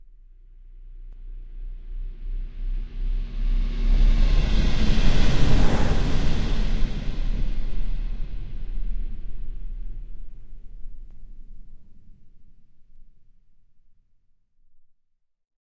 Deep large, smooth and complex one shot movement in an electroacoustic style. Made of edited home recording.
deep, electroacoustic, percussive, slow, smoth, movement